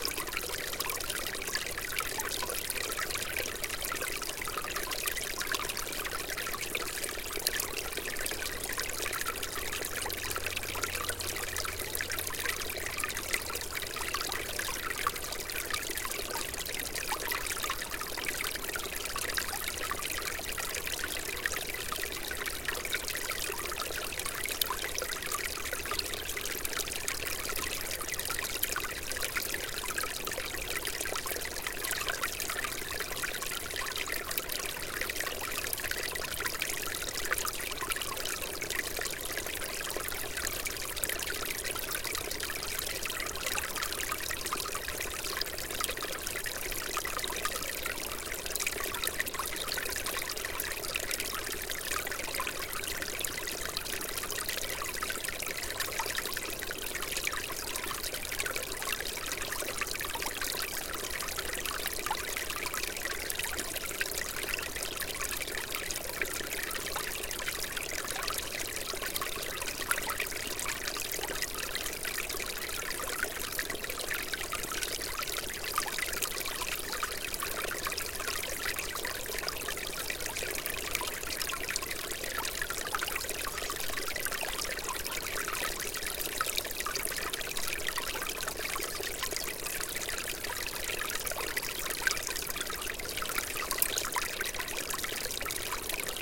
snow thawing
Recorded with zoom h1.
Very relaxing whitenoise loop.
water, loop, white-noise, zoom-h1, snow-thawing, ambiente, nature